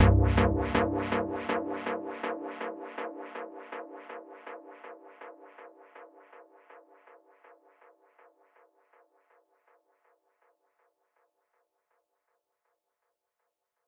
Psychedelic Chord Stab C
Chord stab useful for any trippy tunes